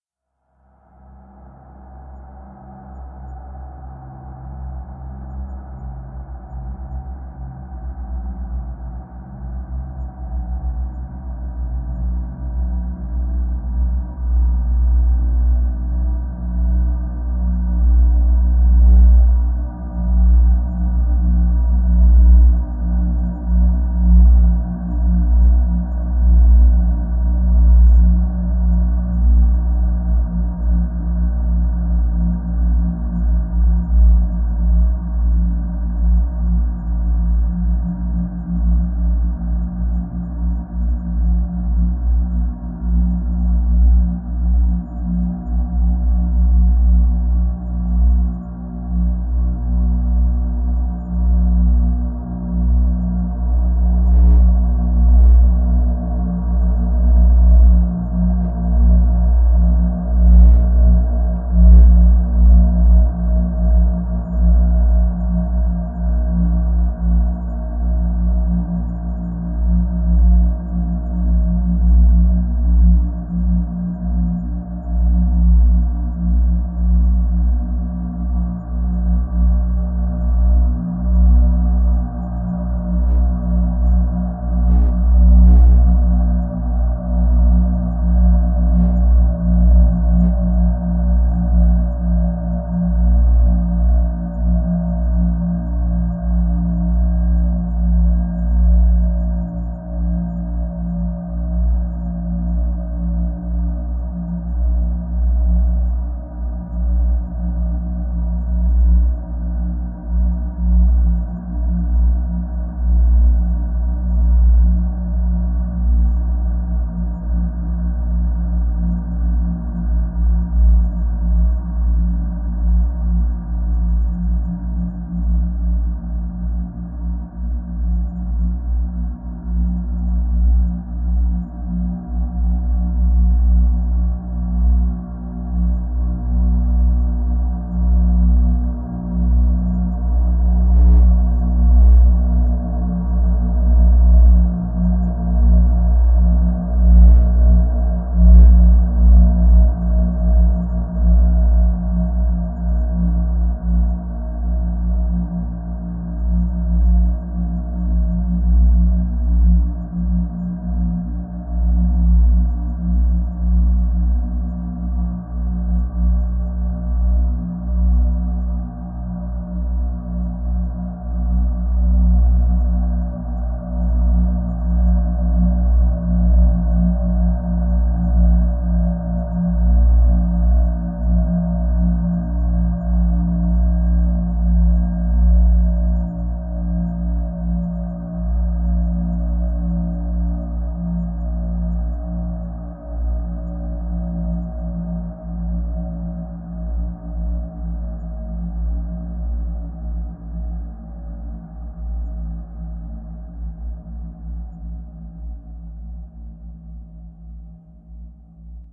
slow dark drone
a sound from an old 'GrooveBox' recording.
loaded to audacity and manipulating the hell out of it.
ambient, dark, drone